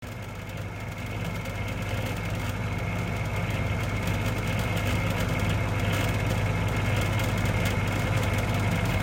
Noisy vending machine
Vending machine humming all it has
Field-recording, humming, refrigeration, vending-machine